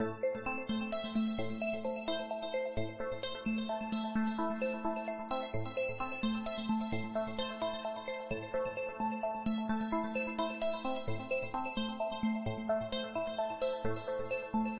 scoring for movies